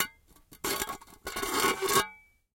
Small glass plates being scraped against each other. Very grating sound, with some resonance from plate at end. Close miked with Rode NT-5s in X-Y configuration. Trimmed, DC removed, and normalized to -6 dB.
noisy, glass, plate, scrape